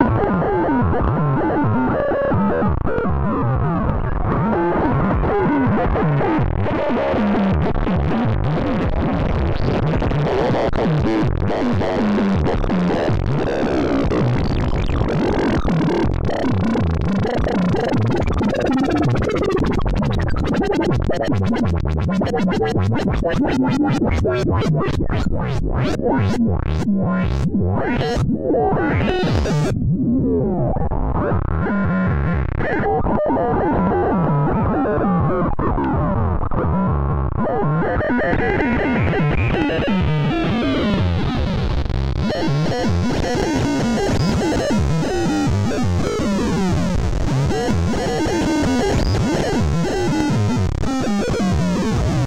This is noise generated by 20 different LFOs on a single oscillator. Some are very high frequency , some are low. The overall sound sort of sounds like ring modulation and can be used as some crazy sci-fi background noise.
am, analog, harsh, noise, random, sci-fi